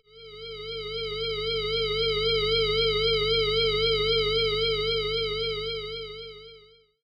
guitar tremolo fade in-out
guitar, fade, tremolo, in-out